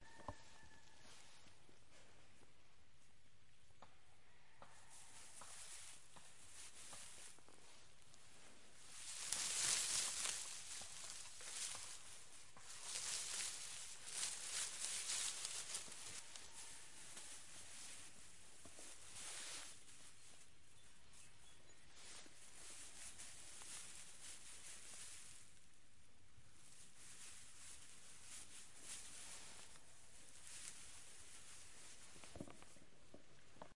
Rustling Grass 1
grass by the river
grass, river